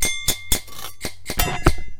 A drum loop I created for a reactable concert in Brussels using kitchen sounds. Recorded with a cheap microphone.
They are dry and unprocessed, to make them sound good you
need a reactable :), or some additional processing.